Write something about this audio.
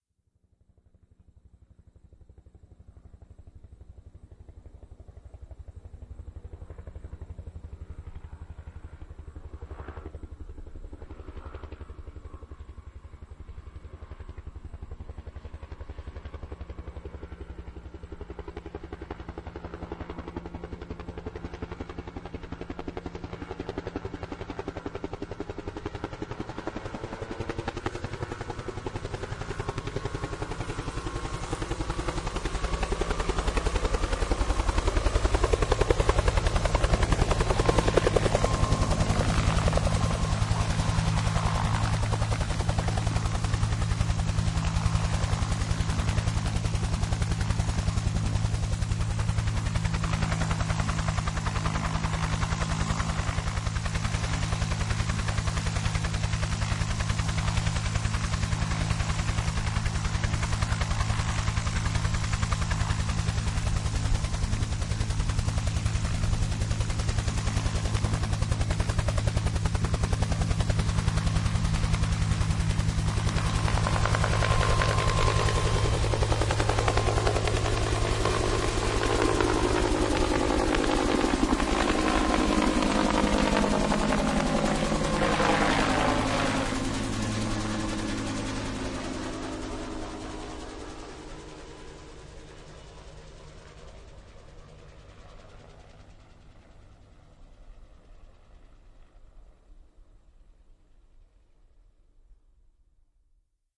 Three recordings of a UH-1 Helicopter landing, loading passengers and then taking-off. Each of the recordings is slightly unique based on where it landed and wind conditions.